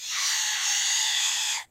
Robot Zombie Scream Sound Effect
A robotic zombie scream
zombie, monster, robotic